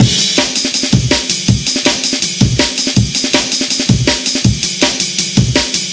A basic Breakbeat 162bpm. programed using Reason 3.0 and Cut using Recycle 2.1.